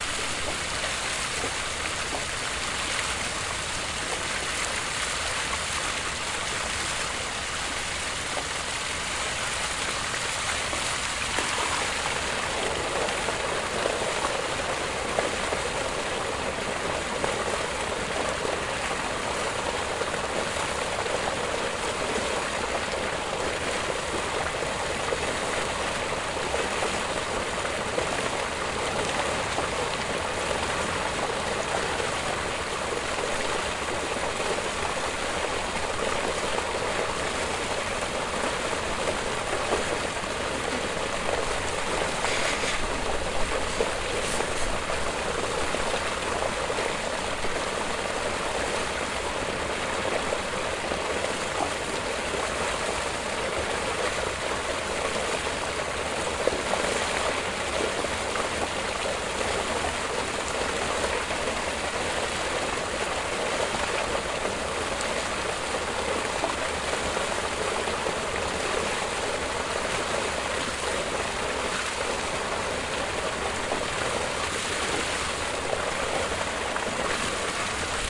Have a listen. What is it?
park water tube 20130317 4
City park. Tube with hot mineral water. Gurgle of pour out water into lake.
Recorded: 17-03-2013.
XY-stereo, Tascam DR-40, deadcat